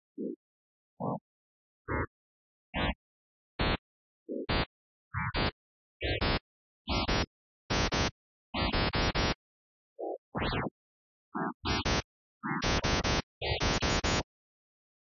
Sequences loops and melodic elements made with image synth. Based on Mayan number symbols.
loop, sequence, sound, space